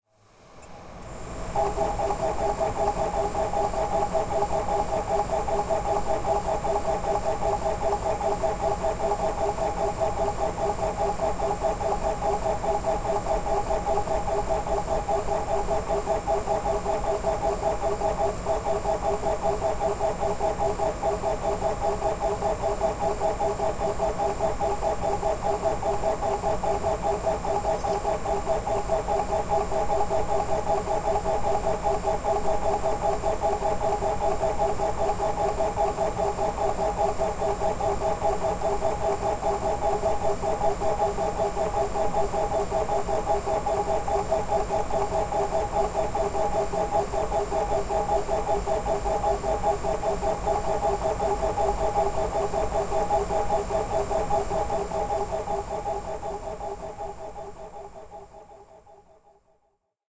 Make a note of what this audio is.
Computer - Desktop - CD - Search
CD drive on computer struggles to read CD data. Tracking mechanism can be heard constantly moving backwards and forwards.
cd-head, cdrom, cd-rom, cd, pc, cd-search, desktop, computer